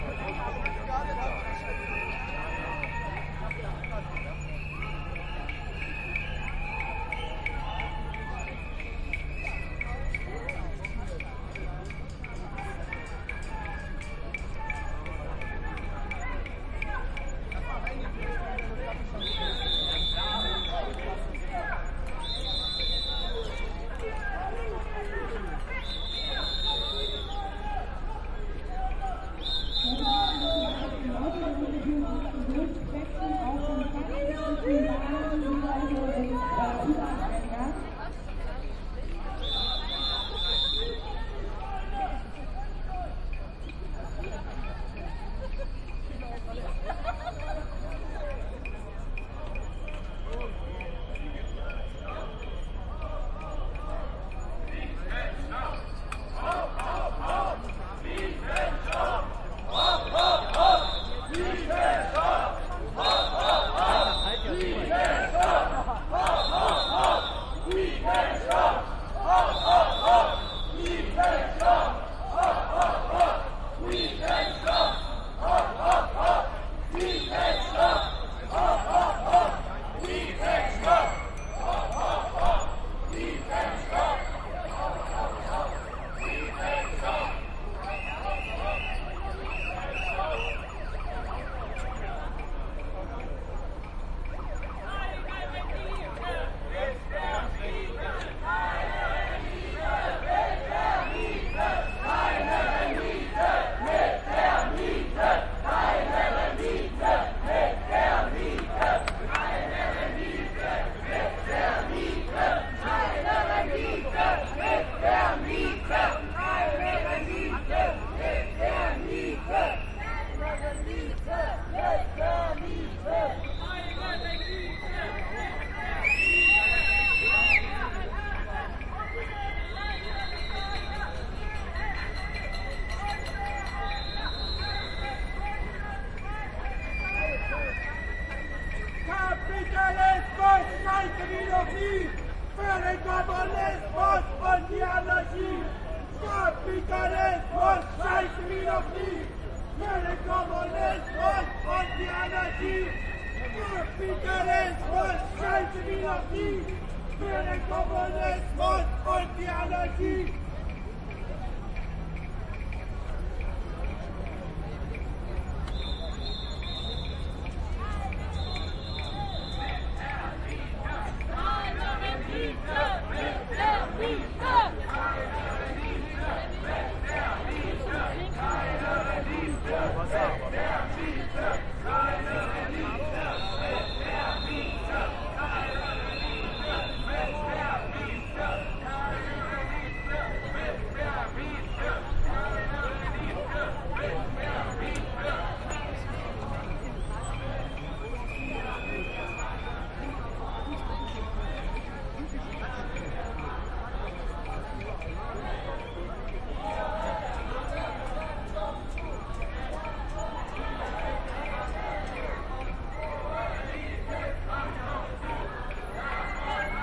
this is a demonstration taking place at potsdamerplatz in berlin. it is a demonstration against the sepeculation on rental houses.